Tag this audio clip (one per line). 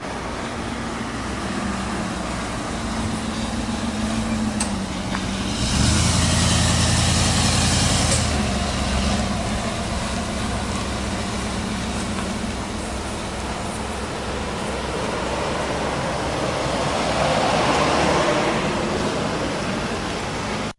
home
drive
motor
automotive
transportation
transport
travel